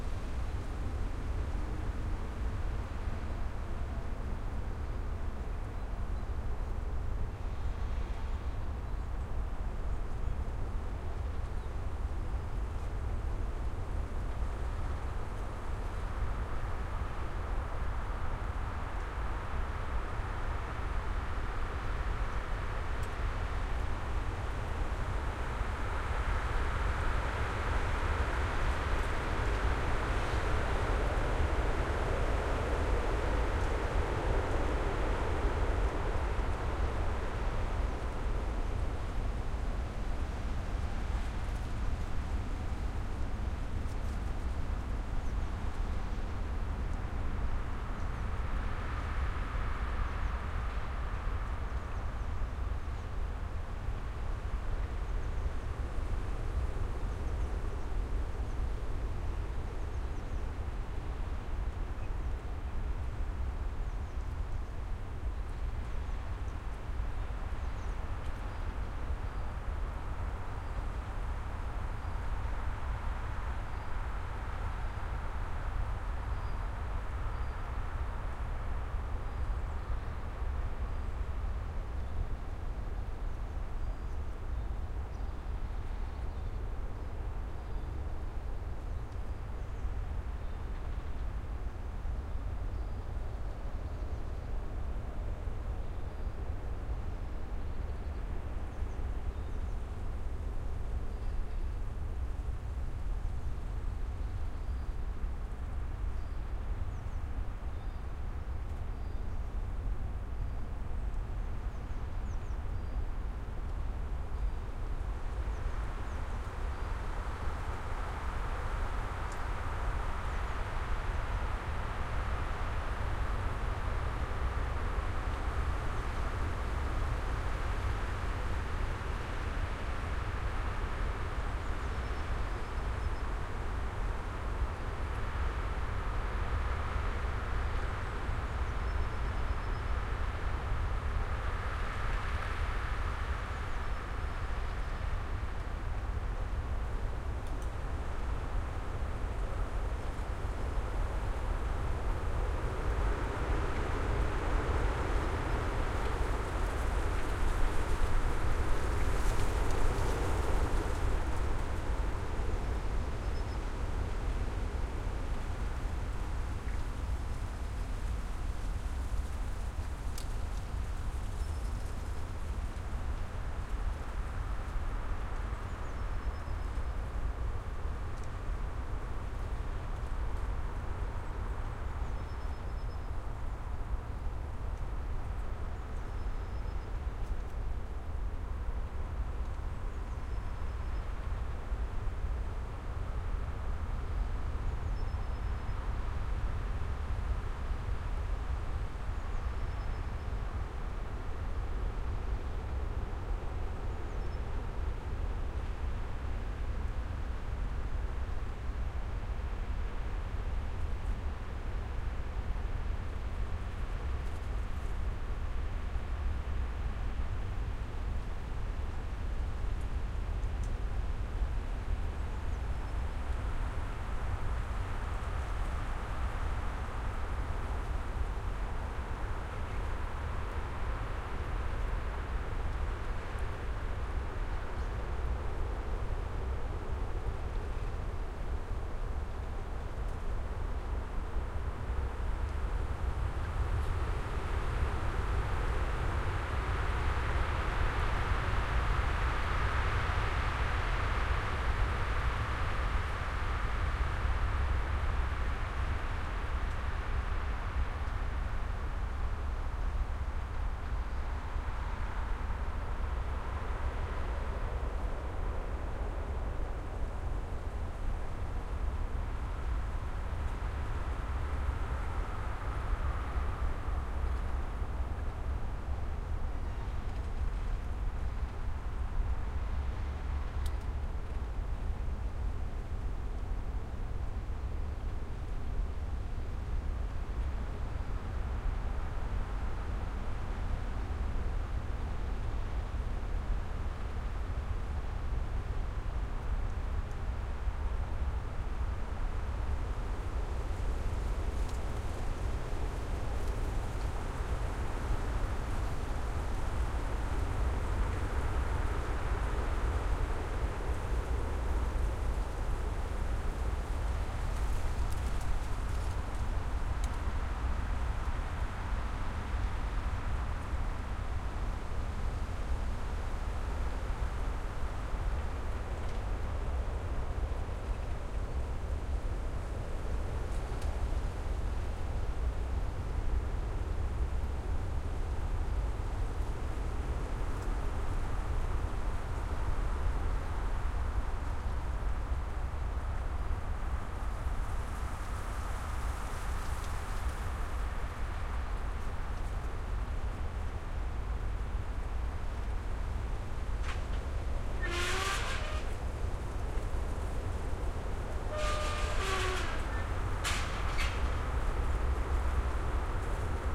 windy forest and squeaky gate
Recorded in February 2011 at one of my favourite spots. It was a windy day in this forest and there is this pyramid, to which grounds there is this squeaky gate. Very spooky!
spooky, athmoshere, wind, forest, flickr, squeak, windy-forest